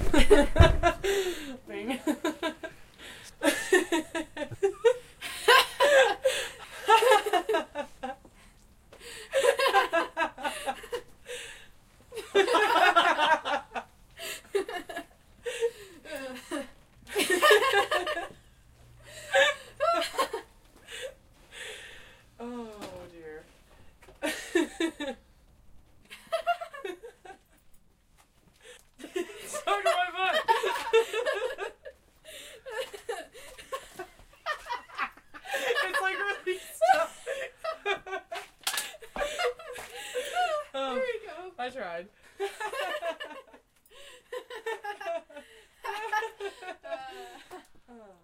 A recording of two 20 year old females laughing in my garage. Zoom recorder.
Females-laugh, girls-laugh, Laughing